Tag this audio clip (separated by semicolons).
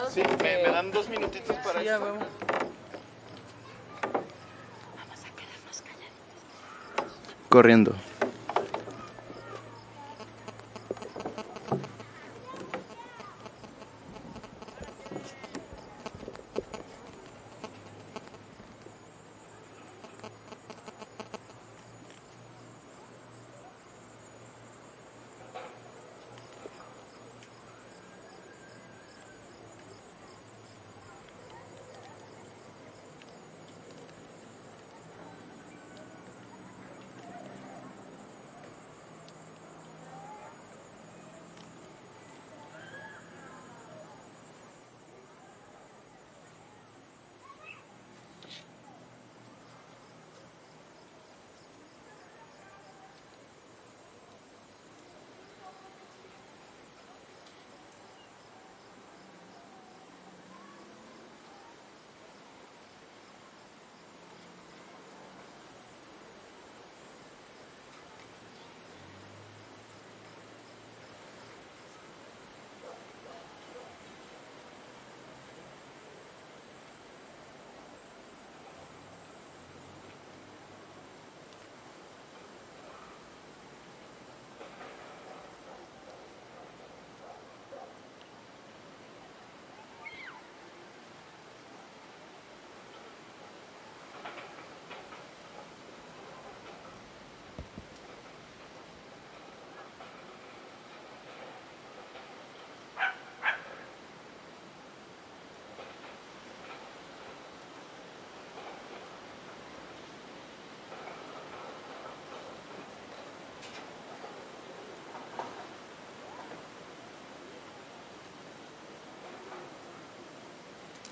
ambience; atmo; background